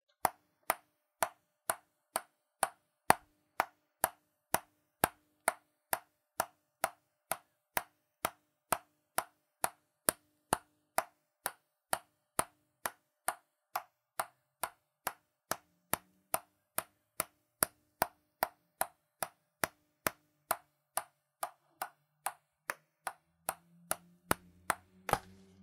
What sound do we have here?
A ping pong ball bouncing.
{"fr":"Ping Pong Ball 2","desc":"Une balle de ping pong qui rebondit.","tags":"ping pong balle sport tennis table"}